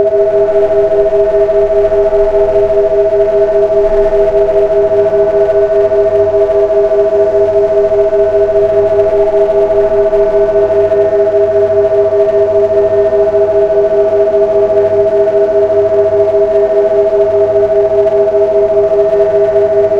Laser beam 20 seconds. Use Audacity:
Mono Track (left)
- Tone
Waveform: Sine
Frequency: 400
Amplitude: 0.5
Duration: 00h 00m 20s
Mono Track (right)
- Tone
Waveform: Sine
Frequency: 405
Amplitude: 0.5
Duration: 00h 00m 20s
Mono Track (left)
- Tone
Waveform: Sine
Frequency: 637
Amplitude: 0.5
Duration: 00h 00m 20s
Mono Track (right)
- Tone
Waveform: Sine
Frequency: 651
Amplitude: 0.5
Duration: 00h 00m 20s
- Change Speed
Speed Multiplier: 0.800
- Change Pitch
Percent Change: 40
- Echo
Delay time: 0.05
Decay Factor: 0.9
laser, sci-fi, power, space, alien